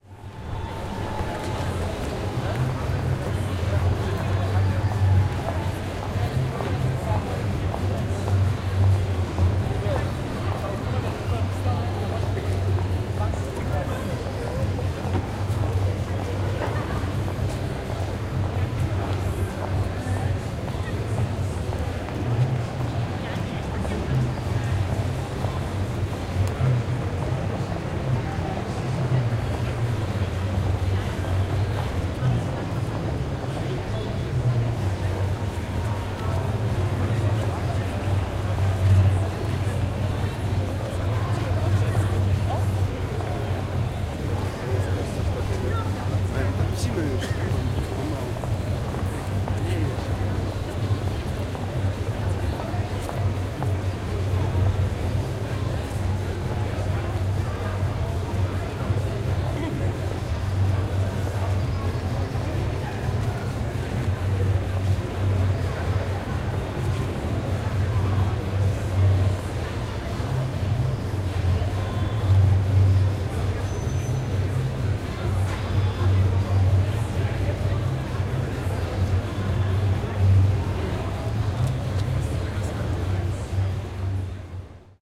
111022-old market
22.10.2011: about 22.30. The Old Market in the center of Poznan/Poland. The Saturday evening ambience: passing by people, hubbub, steps, voices, music.
music, old-market